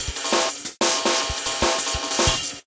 SIck BEats from The block -
Sliced and Processed breaks beats and sick rythms for IDM glitch and downtempo tracks Breakbeat and Electronica. Made with battery and a slicer and a load of vst's. Tempos from 90 - 185 BPM Totally Loopable! Break those rythms down girls! (and boys!) Oh I love the ACID jazZ and the DruNks. THey RuLe!